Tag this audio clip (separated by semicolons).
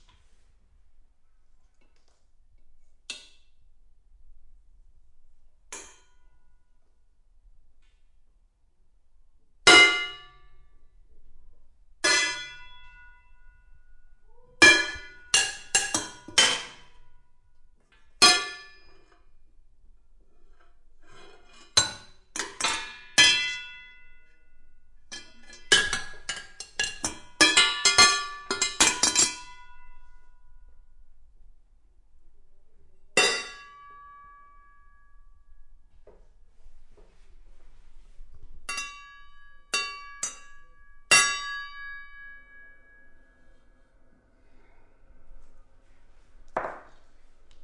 saucepan; pan; crash; metal; cooking